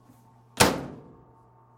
Closing a Laundry Dryer Door
Closing, Door, Dryer, Laundry